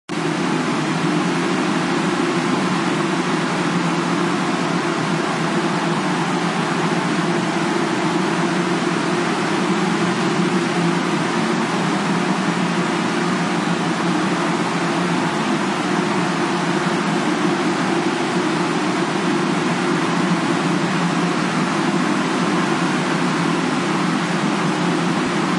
Reaktor's Space Drone Ensemble into a bunch of plate 140s on the UAD and a couple instances of space designer. Fairchild emulations for compression.